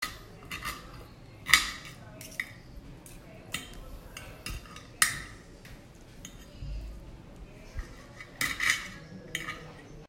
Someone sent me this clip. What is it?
is the sound of a person using a fork and knife to cut their food during lunch